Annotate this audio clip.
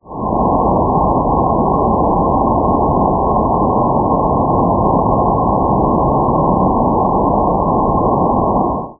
GW170817 (On LIGO Livingston)
The Gravitational Wave Signal GW170817 on LIGO Livingston
Sweep, GW17017